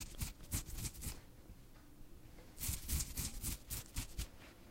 Itching Skin
A person itching/scratching their skin.
Scratching-skin, Skin, OWI, Itching-skin, Itchy, itching